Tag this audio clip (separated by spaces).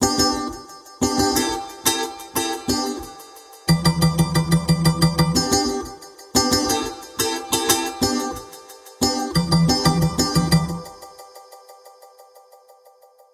sample interlude trailer stabs rap podcast broadcast sound instrumental chord hip-hop drop music club dancing stereo intro jingle background radio loop